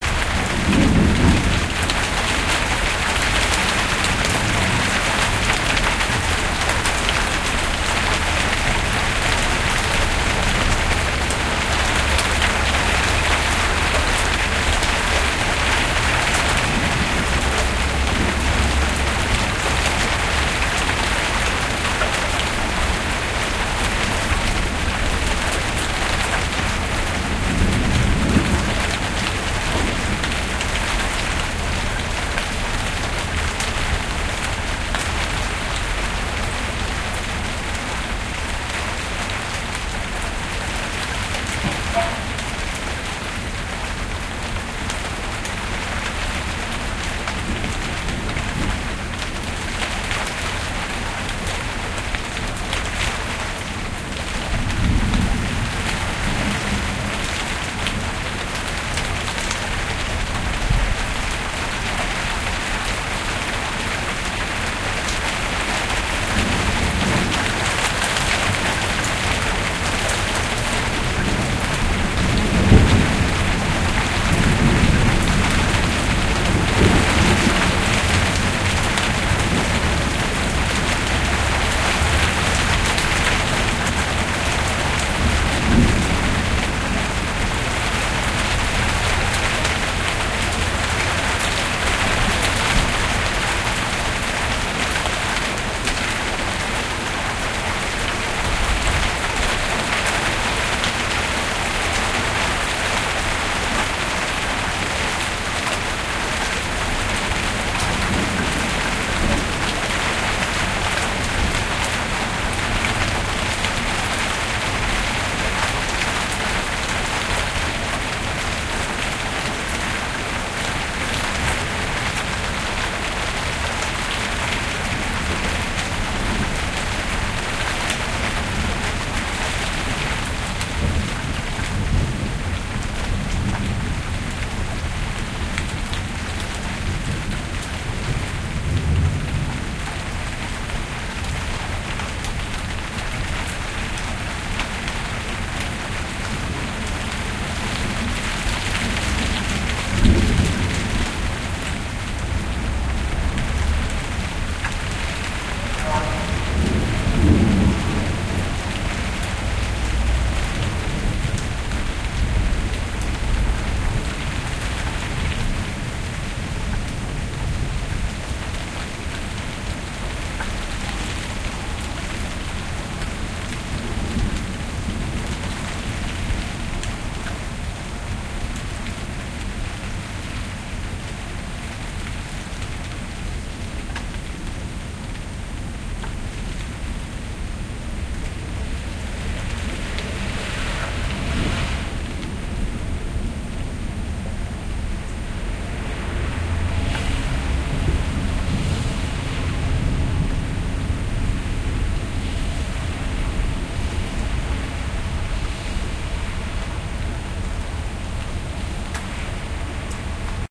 rain and thunder in town2
Heavy raindrops and distant thunders recorded on my veranda with some street noise in Cologne in July 2006. Unfortunately there is a constant beep at about 8700 hz. OKM Binaurals to Marantz PMD671.
rain, water, nature, town, street, drop, field-recording, weather, thunder